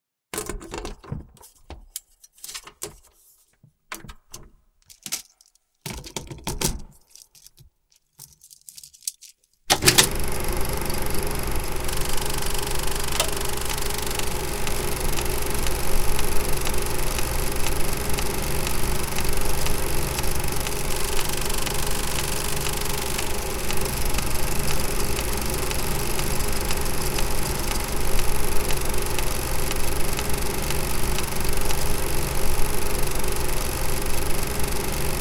Projectionist and his Analog Movie Projector
analog, cinema, cinematography, effect, fx, movie-projector, projectionist, sfx, sound-design, sound-effect